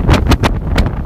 wind windy storm
storm, windy, wind